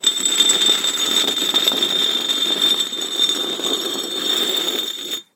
This is the sound of a brick being dragged across a concrete floor. Some suggestions for alternate uses could be a for a large stone door or other such thing.